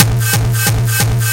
xKicks - Apprentice
Do you LOVE Hard Dance like Gabber and Hardstyle? Do you LOVE to hear a great sounding kick that will make you cry its so good?
Watch out for This kick and Several others in the xKicks 1 Teaser in the Official Release Pack.
xKicks 1 contains 250 Original and Unique Hard Dance kicks each imported into Propellerheads Reason 6.5 and tweak out using Scream 4 and Pulveriser
180, bass, distorted, techno, kickdrum, drum, style, hard, single-hit, hardcore, beat, kick-drum, kick, distortion, dirty, gabber, 180bpm